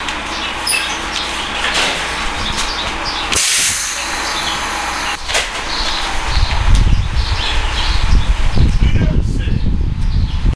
Field recording of waiting in line for bus. Driver announces New York City, some wind noise.
new-york-city, air